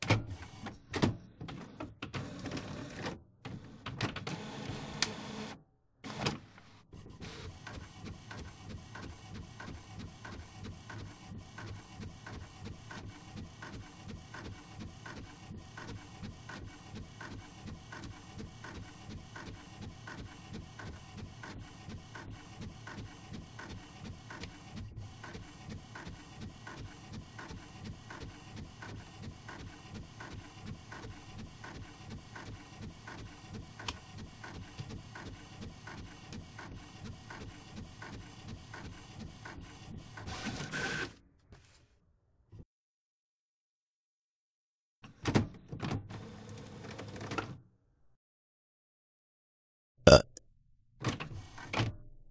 HP PSC2355 printer
Stereo recording of an HP PSC 2355 all in one printer printing one piece of paper.
background, computer, office